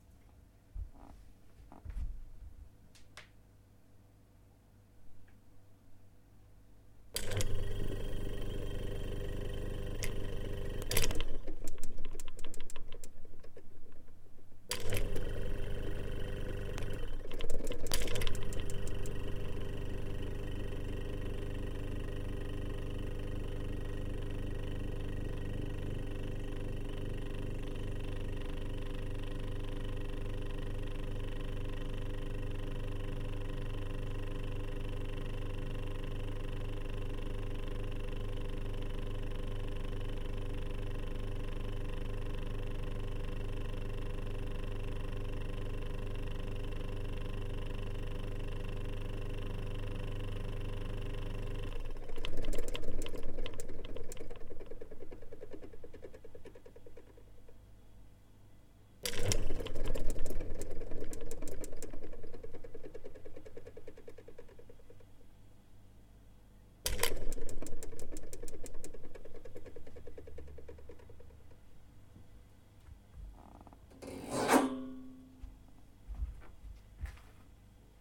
Old soviet fridge.
domestic, engine, fridge